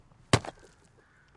object-hit
This is a sound I created to replicate a person hitting an object, I cant remember how I made it, probably kicking a tree or something of the like
Stay awesome guys!
collision, golf-ball-hitting-person, hit, kick, punch